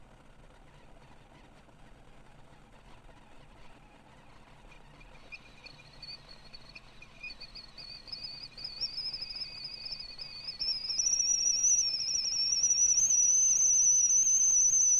Recording of a tea kettle whistle.
Created using an HDR sound recorder from MSU.
Recorded 2014-09-13.
Edited using Audacity.